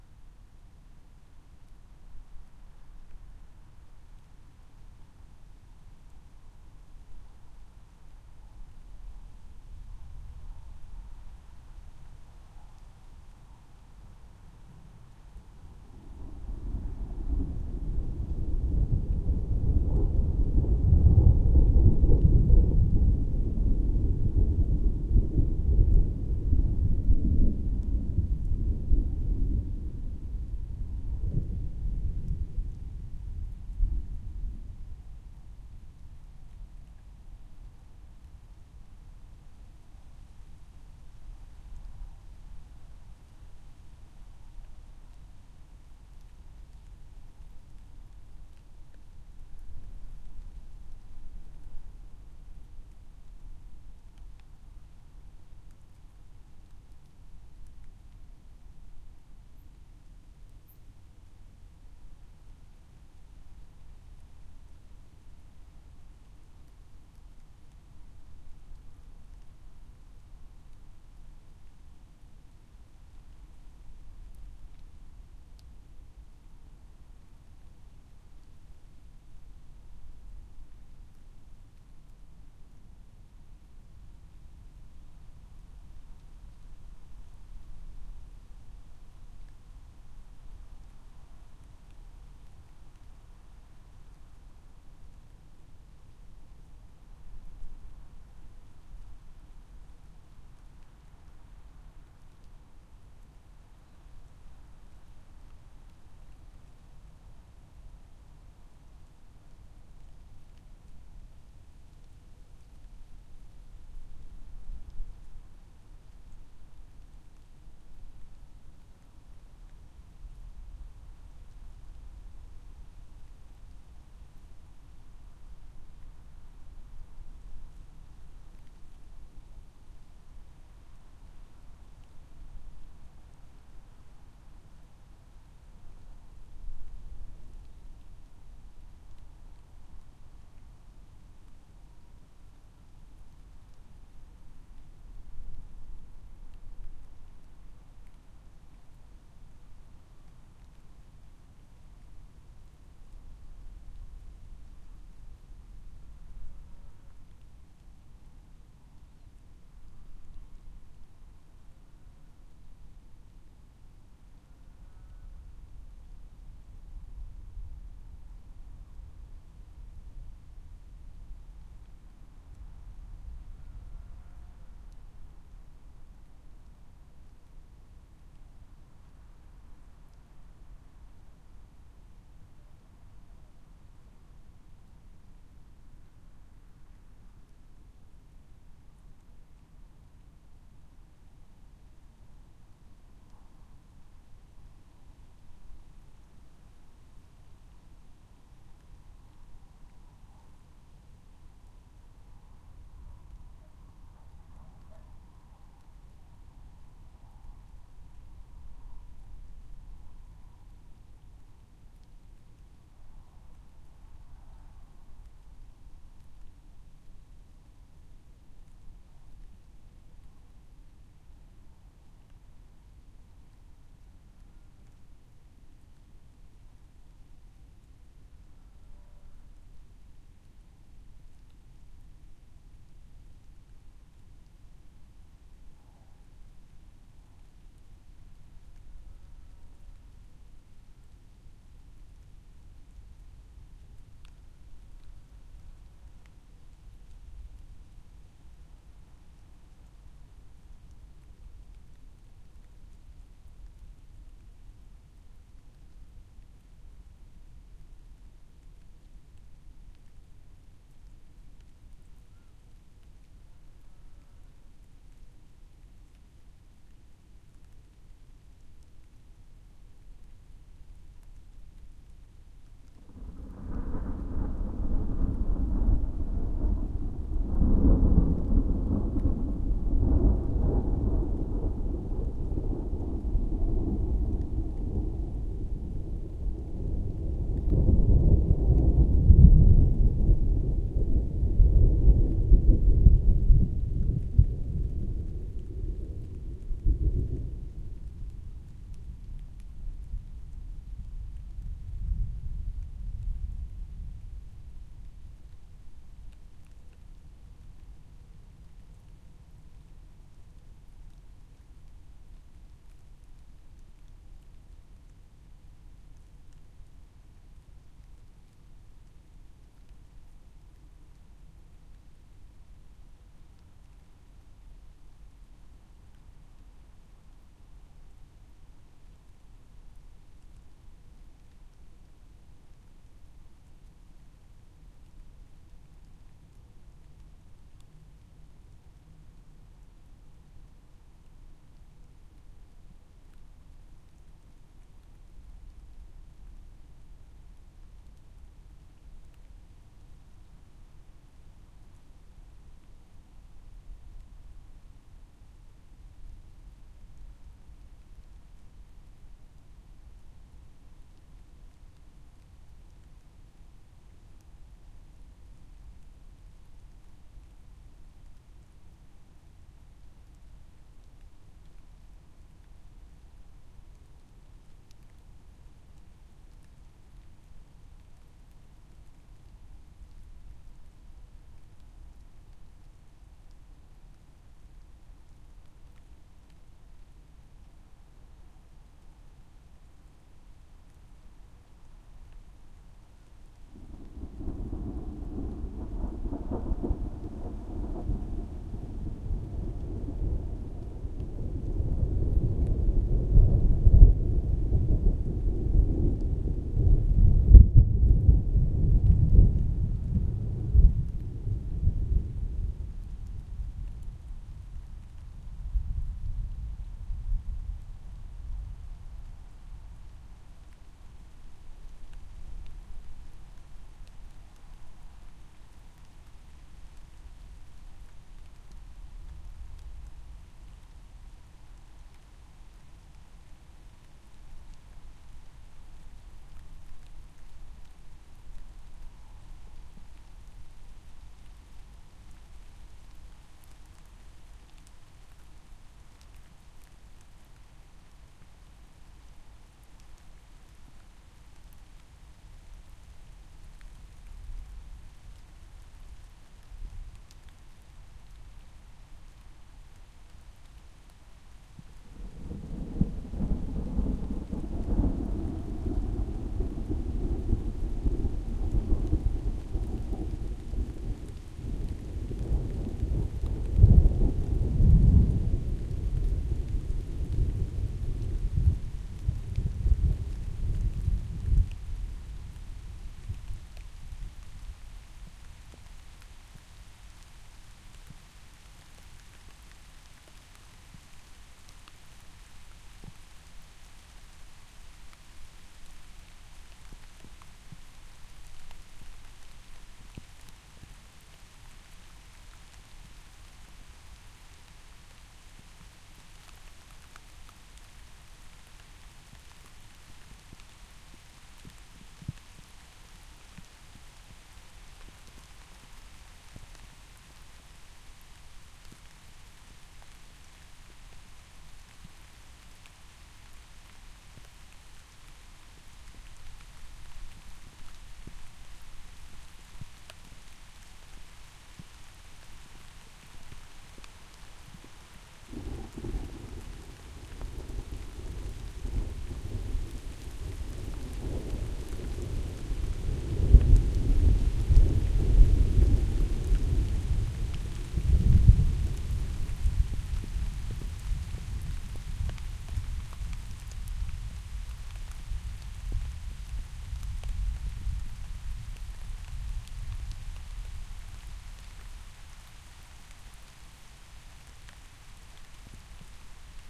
Thunder and rain 09-11 2011
This recording is from day with heavy thunder. I didn't get it all, cause a few minutes earlier it was so close, that it actually killed my computer, while lightning traveled through my internet connection. No more wired network for me!
Recorded with a Sony HI-MD walkman MZ-NH1 minidisc recorder and two Shure WL183 mics.